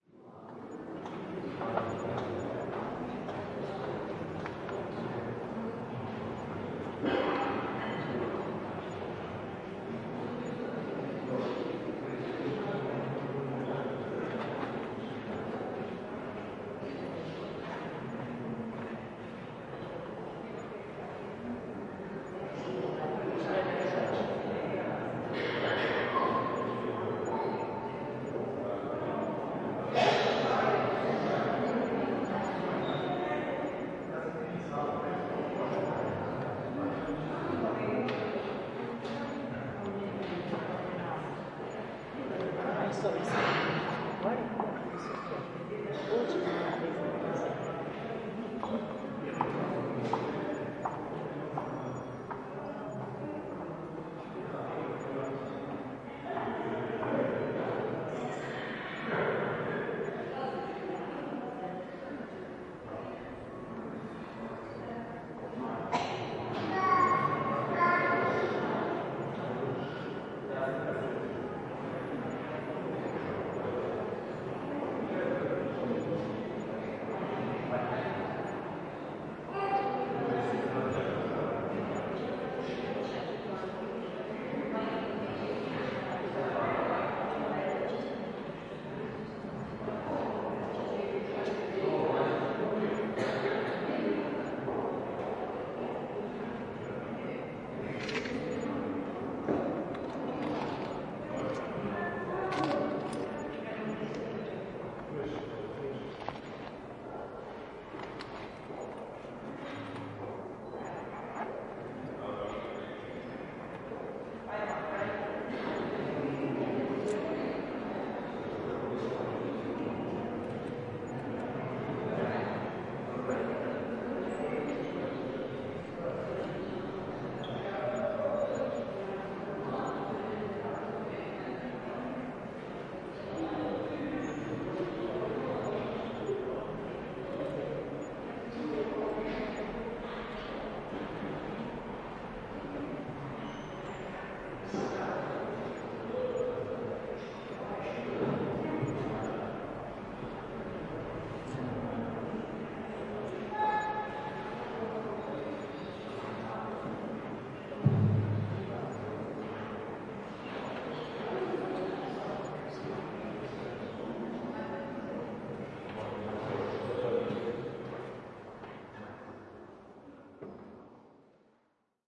crkva sv. Vlaha wedding dubrovnik 070516
07.05.2016: 16.30. Recorded inside the crkva sv. Vlaha in Dubrovnik (Old Grad). Ambience of the family photo session after the church marriage. No processing (recorder marantz pmd620 mkii + shure vp88).